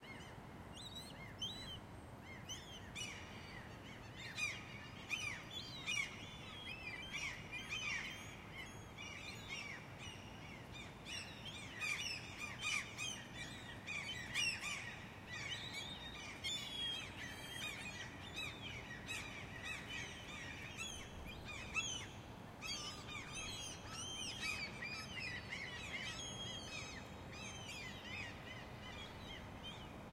Many seagulls
nature, seagulls, animals